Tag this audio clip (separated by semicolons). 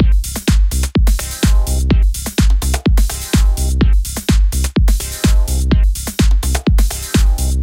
126-bpm; beat; drum-loop; groove; house; loop; minimal-house; rhythm